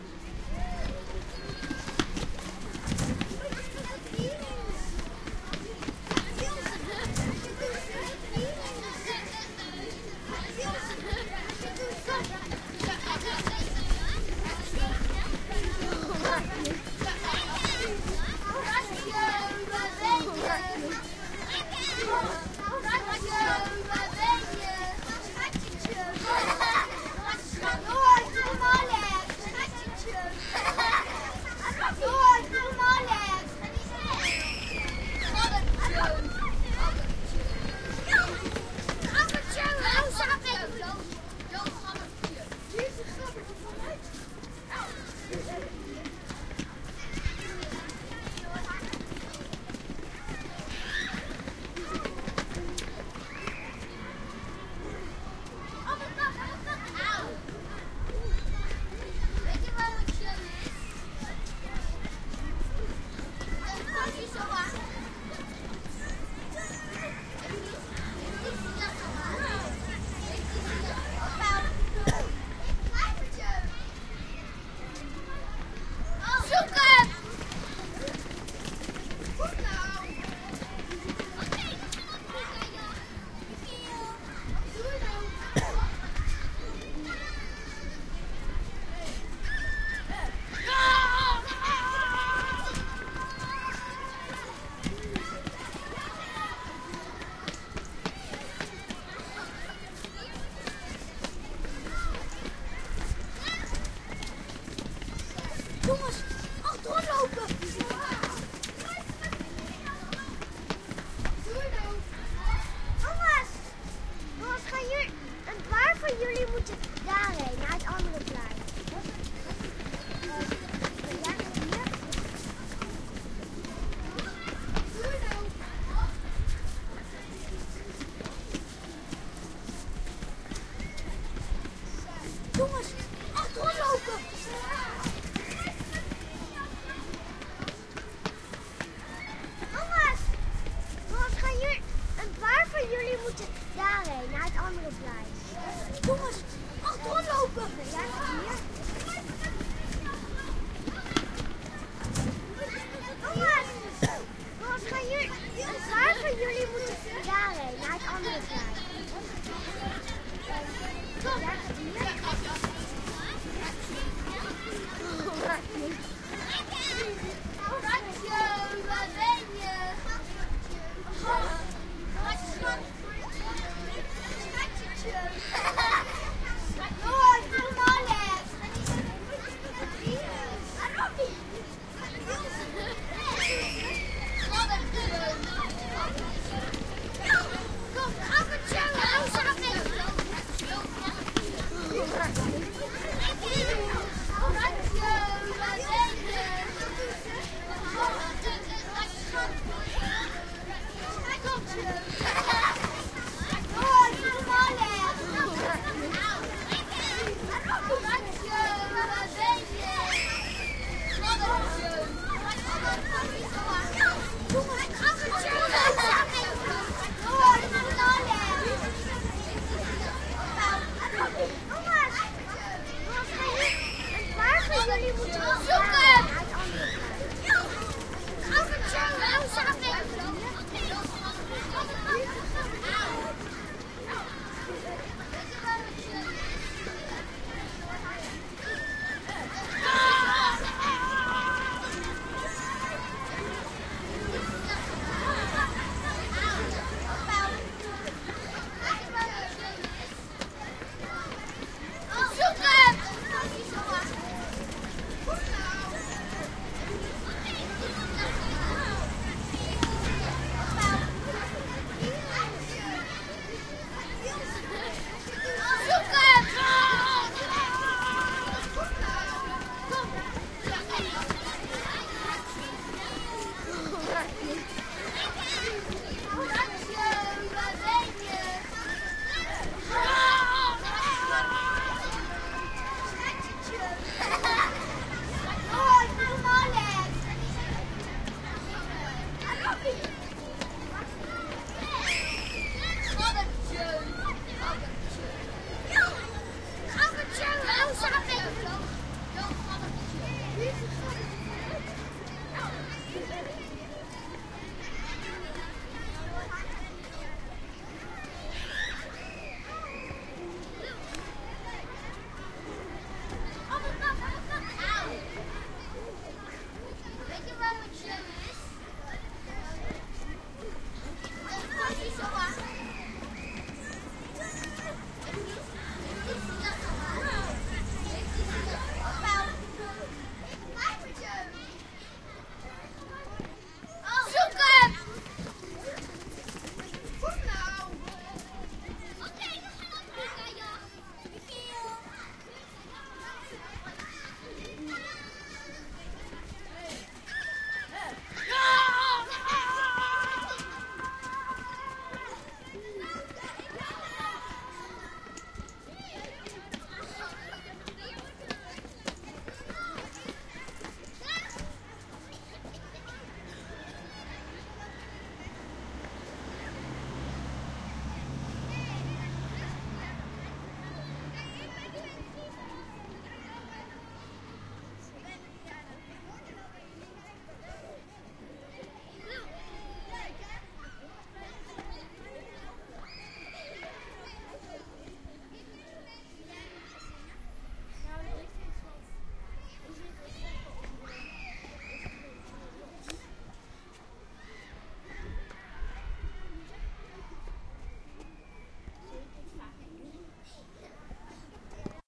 stereo recording of schoolchildren leaving school for the afternoon break.location Annen, the Netherlands
children, running, school, schoolyard, footsteps